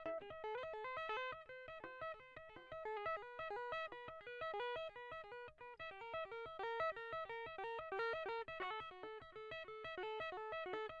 metal guitar riff cln
A clean recording of a typical metal 'hammer-on' guitar riff.
It's
very soft because the guitar technique hammer-on is done literally by
"hammering" down the string with a left hand finger, often performed in
conjunction with a note first plucked by the right hand on the same
string.
I'm still working on mastering this technique.
clean, guitar, hammer-on, loop, solid-body